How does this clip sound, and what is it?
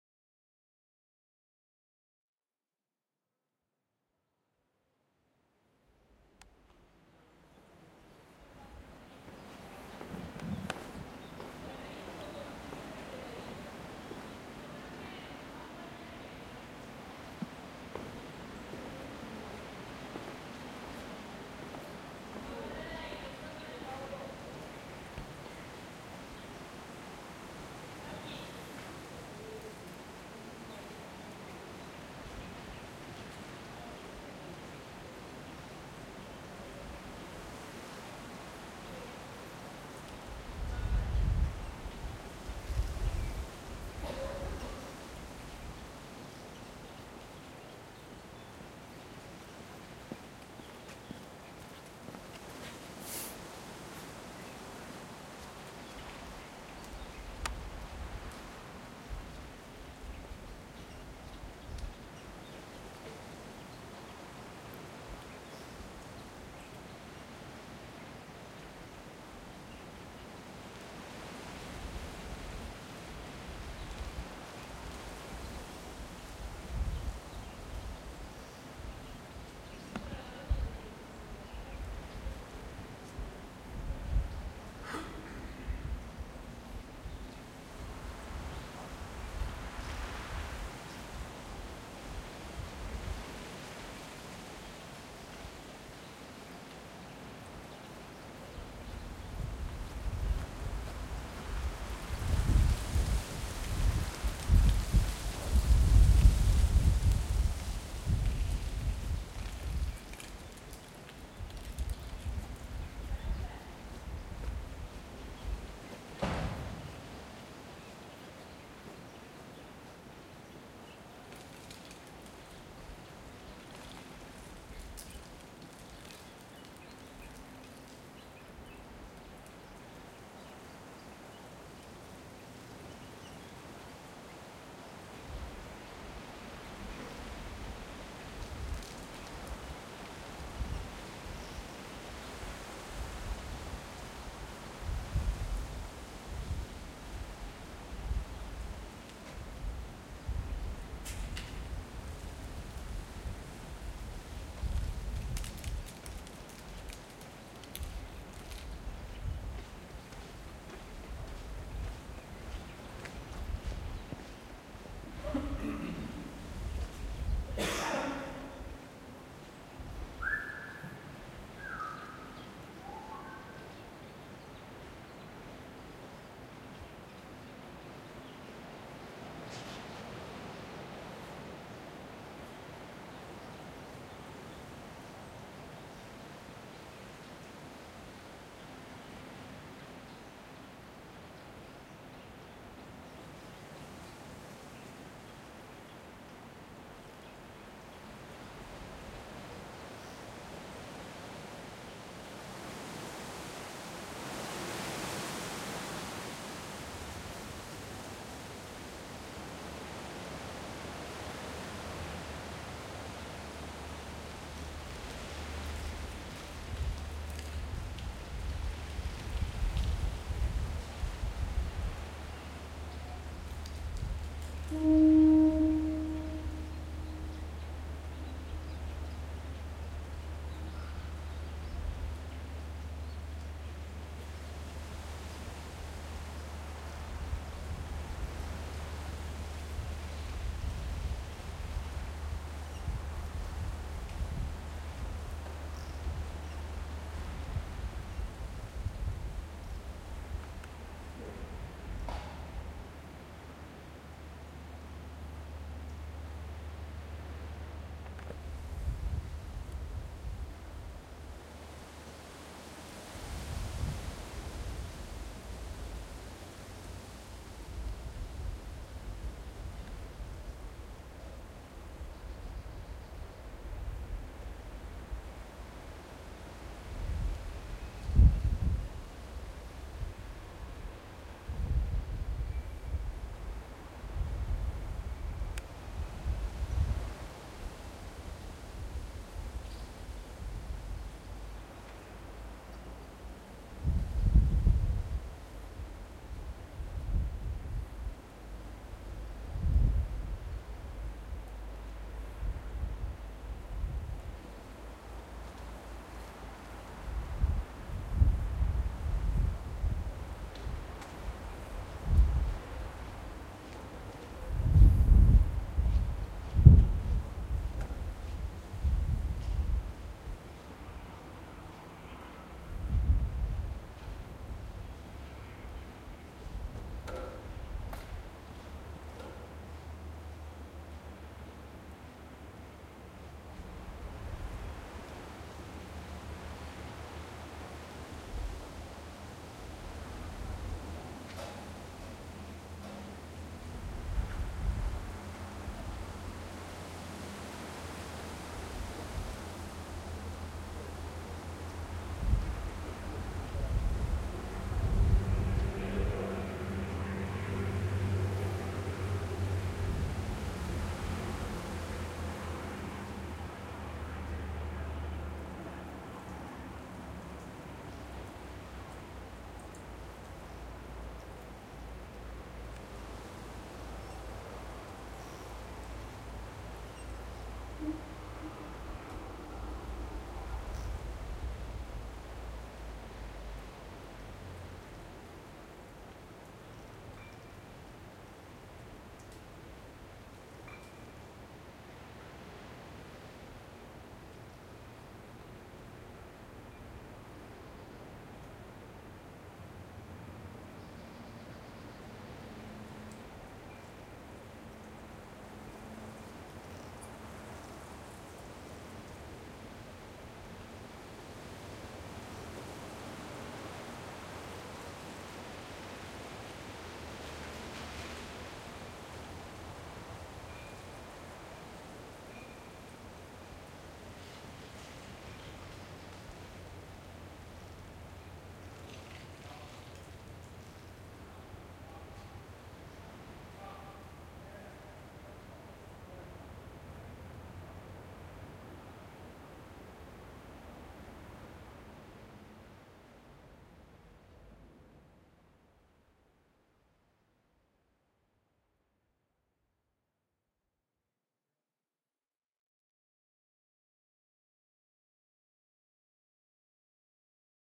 Foliage & Wind recorded inside the 'Tonnara Florio'
date: 2011, 08th Dec.
time: 01:00 PM
gear: Zoom H4 | Rycote Windjammer
place: Tonnara Florio (Favignana - Trapani, Italy)
description: Recording made during the Ixem festival 2011 in Favignana island. Shot taken inside the 'Tonnara', during lunch break, recording the rustle of leaves, the wind and some distant birds. When the wind is more present, some leaves move onto the ground causing a beautiful sound. Sometime you can hear some people of the Festival walking or, in one case, whistling and a passing truck. At some point a trumpet sound tells you that Alex is preparing his performance!
Favignana, ixem, leaves, leaves-rustling, people-walking, Tonnara-Florio, Trapani, truck, wind